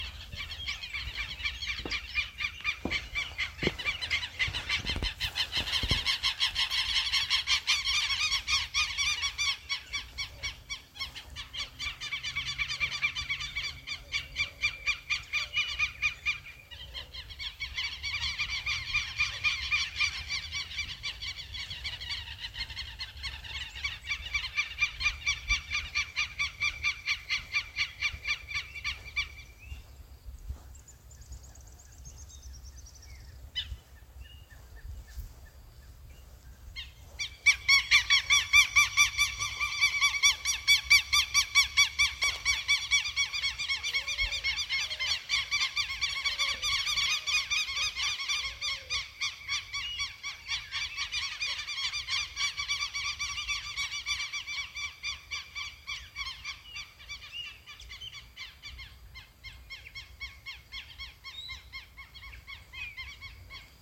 Brazilian bird - Gralha, recorded in Recanto da Saudade, in São Joaquim, Santa Catarina, Brasil.